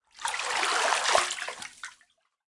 Bathtub Wave 2
Water waves recording in home bathtub.
water, wave, waves